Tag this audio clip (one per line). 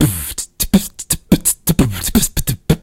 Beatbox
kick
snare